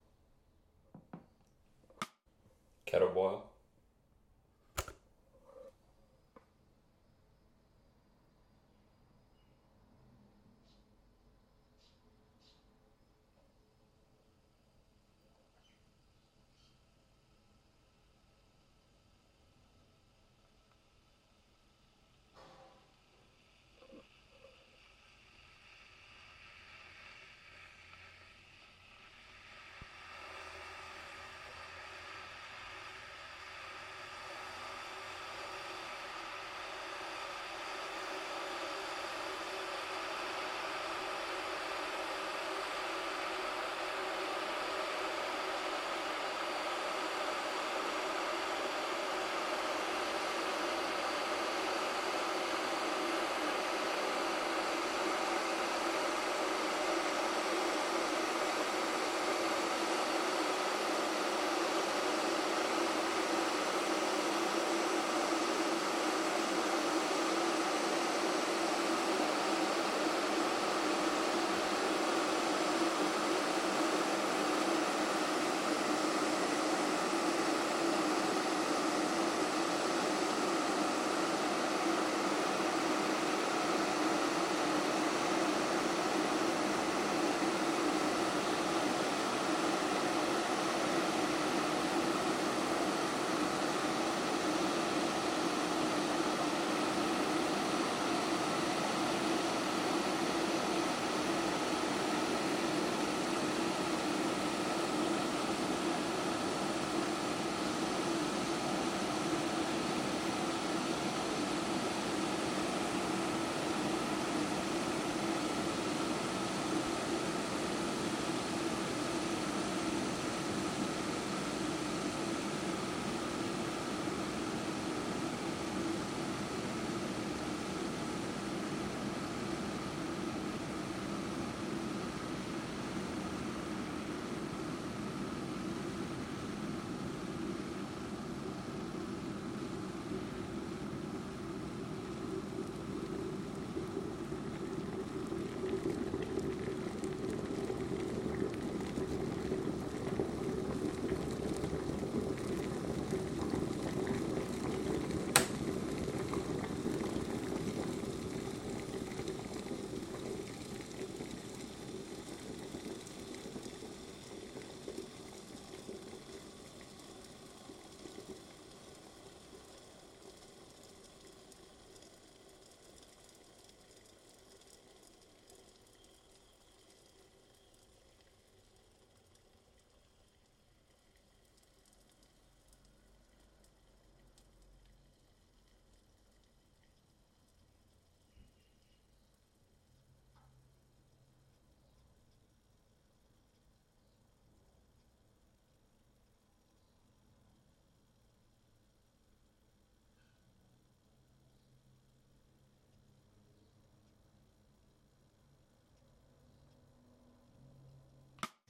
KETTLE BOIL R
Stereo Recording of A Kettle Boiling_R_Some Background noise